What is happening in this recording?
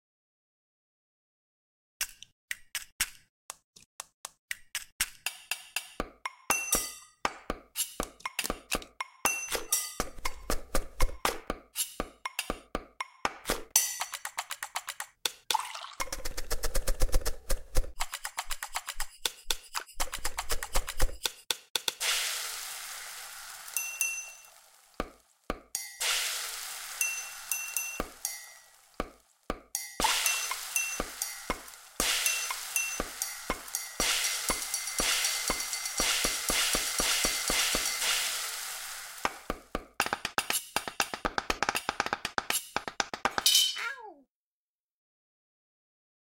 Cabbage Pancake Beat
Percussion from kitchenware while preparing cabbage pancakes. All samples recorded from my kitchen and re-arrange in Mixcraft 8 home studio.